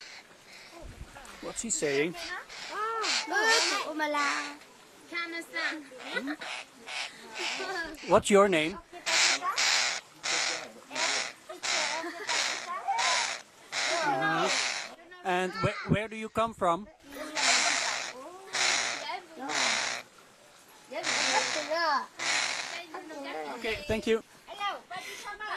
During a so-called "trekking" in stunning beautiful Sapa (I believe it was Cat Cat Village) I interviewed a parakeet (after which for some obscure reason everybody else wanted to get interviewed.) Apart from reducing the volume of my voice (some distortion there) no other processing was done.